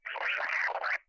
Sounds like a record scratch. Taken from about five minutes of noise, made by holding multiple buttons on a stereo's tape player.J'aime des cassettes de bande ! Refroidissez ainsi les bruits qui les font !